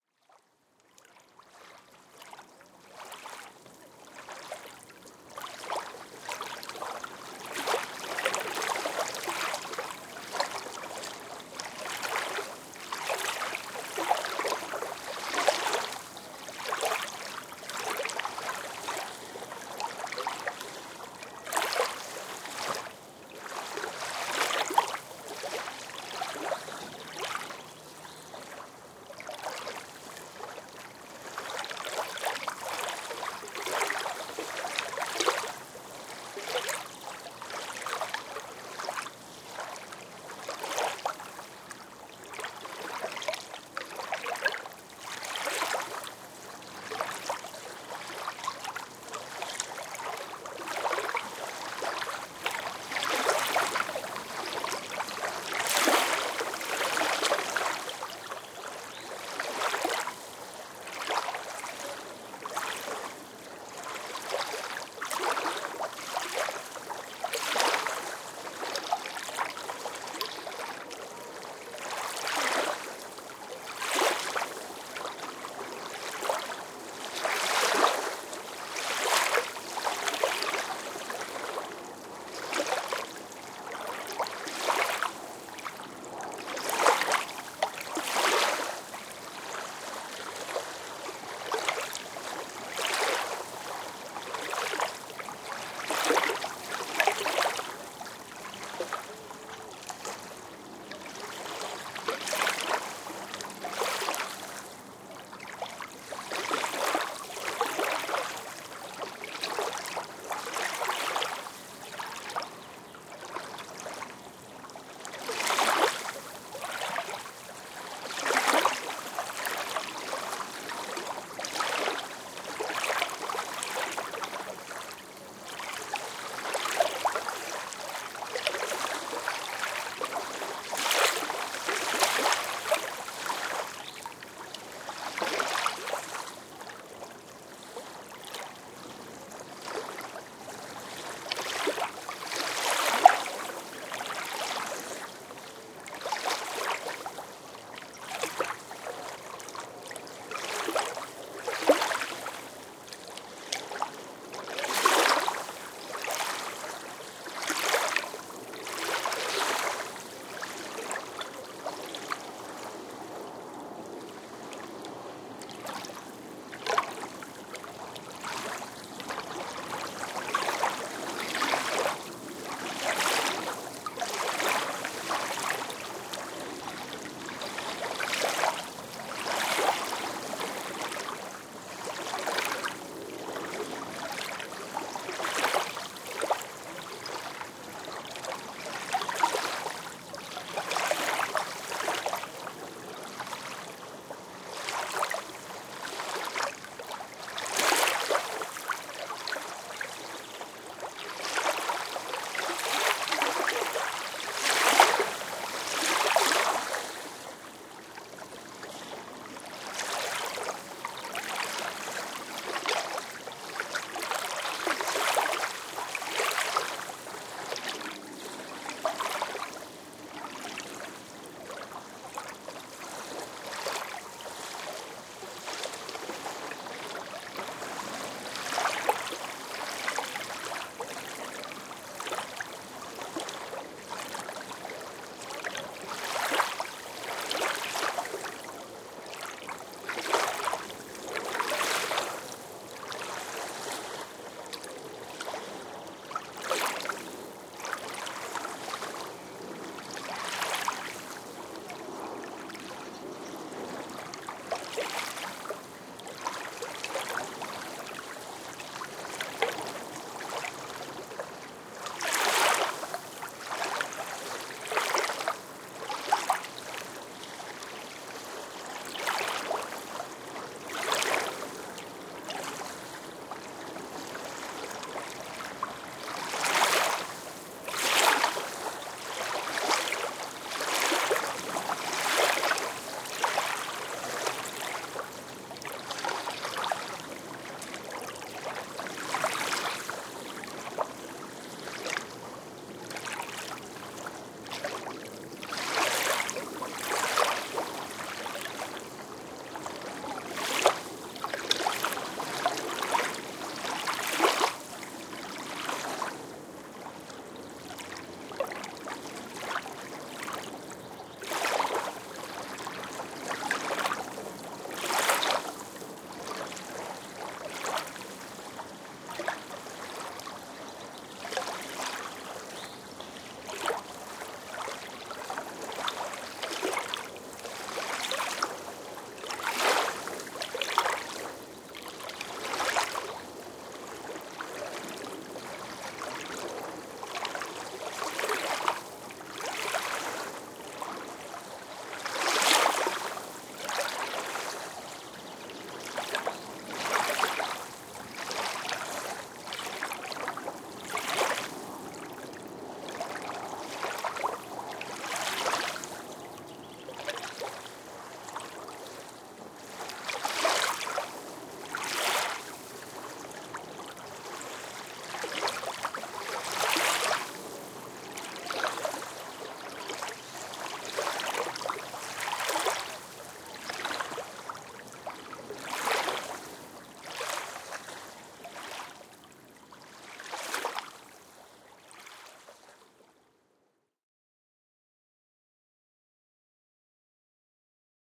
Recording of a lake near Almere in the Netherlands. M/s decoded.